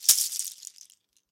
Chajchas ( goat hoofs , South American Rattle ) . One hit with the hand. recorded with AKG C214 at 16bits . mono. unprocessed